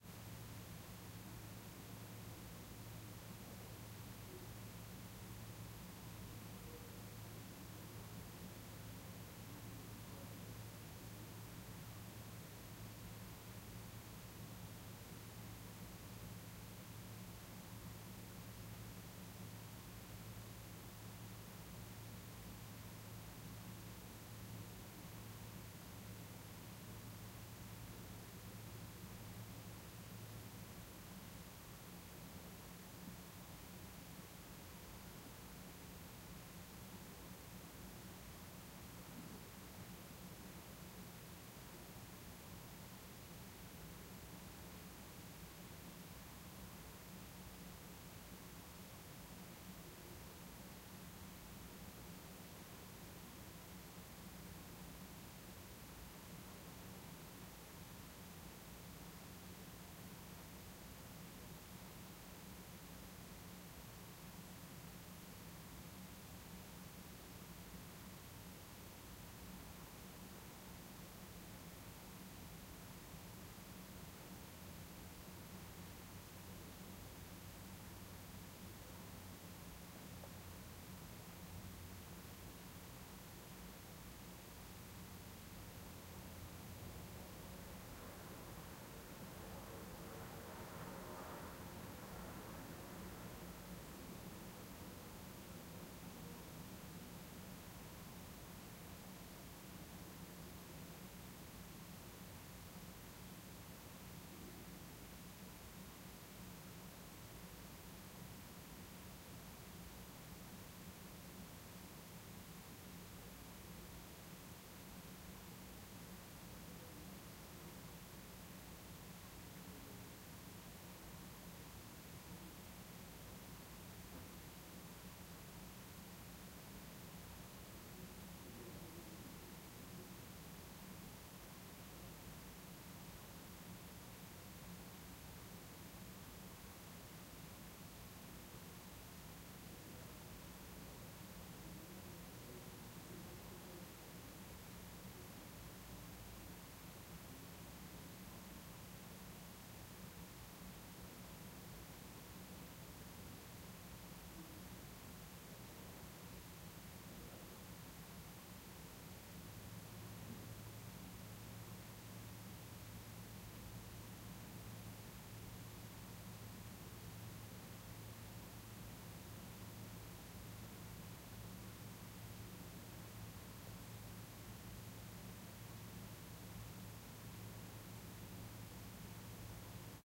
silence movie theater
empty movie theater ambient
calm, silence, movie, quiet, roomtone, room-noise, room-tone, theater